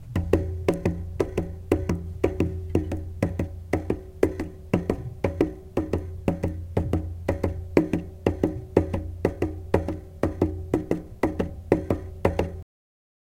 Pounding Tire
Hand hitting top of bike tire--like a horse running
hand, bike, whirr